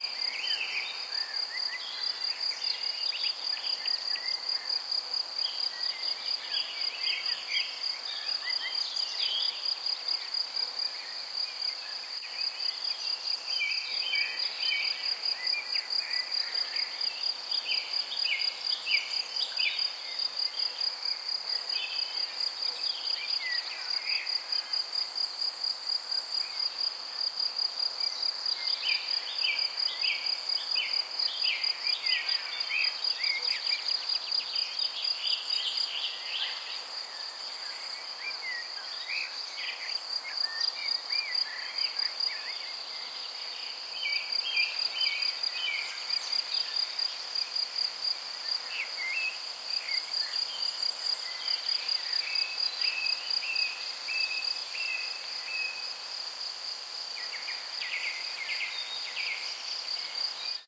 Ambience Nature Crickets Birds STE 013
Nature recording in the "a Chamont-sur-Loire" garden (France). It's rec in the day time. Contains birds, crickets and general ambiance. I hope you will find it useful for you're own projects. Cheers!!
birds, crickets, nature-sound